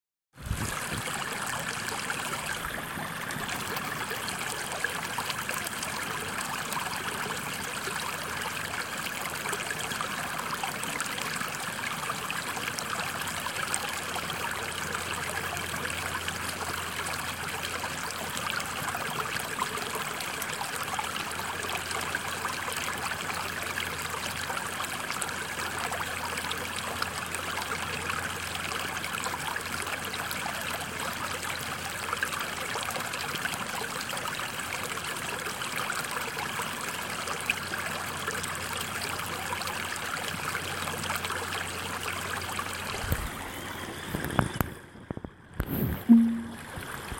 Wasser-Kleiner Bach4
Small stream flowing in the forrest
flowing, stream, water